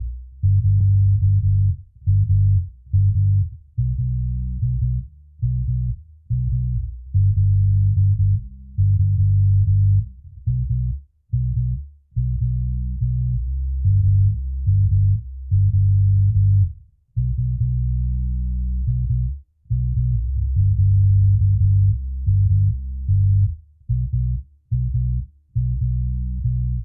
Laba Daba Dub (Bass)
Roots Rasta DuB
DuB, Rasta